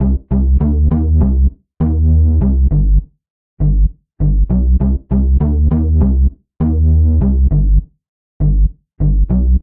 gl-electro-bass-loop-006
This loop is created using Image-Line Morphine synth plugin